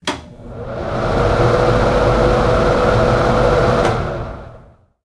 Bathroom Exhaust Fan